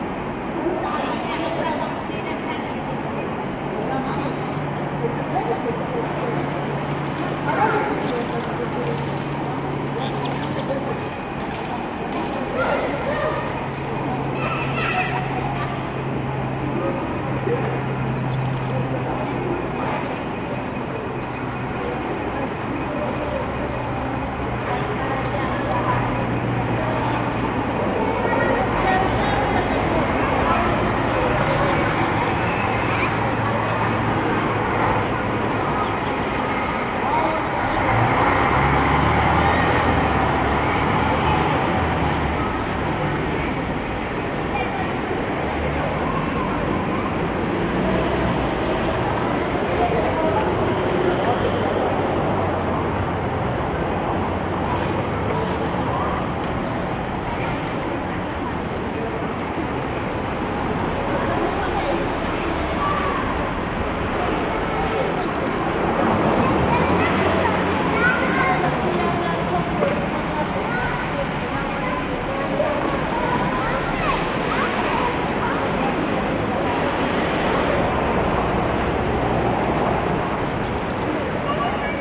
Antwerp City Sounds 01
Sound recording from a square downtown Antwerp in Belgium.Afternoon, kids playing and traffic sounds.
city, city-sounds